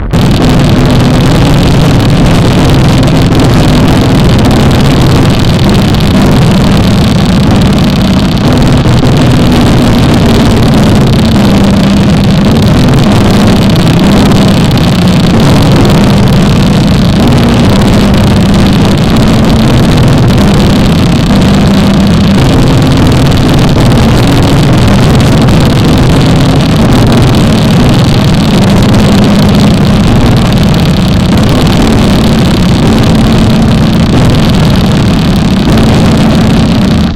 "...and hail weighing xx centners fell from the sky upon the humans...". Revelations.
I don't know how much one centner is, but someone told me it was many kilograms. Such ice pcs falling with a speed of 250 kilometers/hr must be extremly destructive.